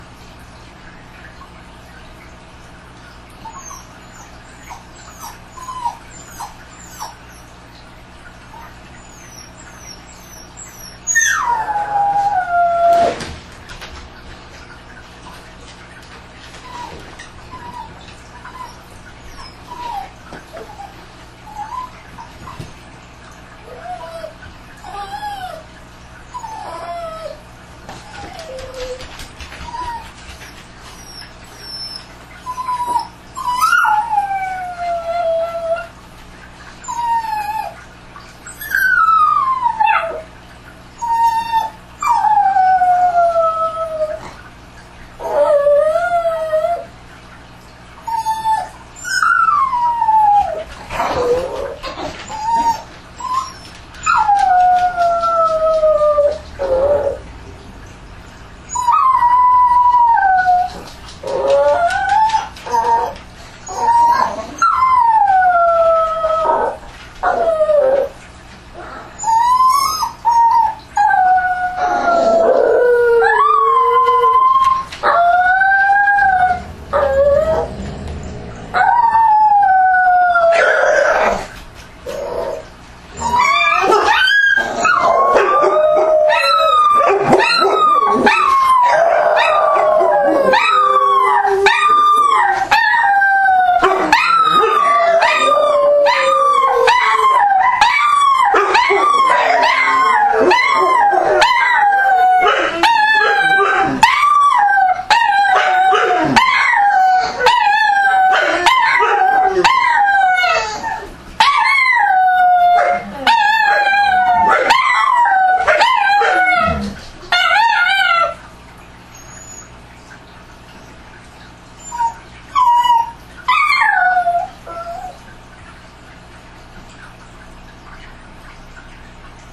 4Dogs Howl
This is a digital field recording of my four dogs crying and howling. I used an Olympus Digital Voice Recorder VN-6200PC
bulldog, howl, poodle, whine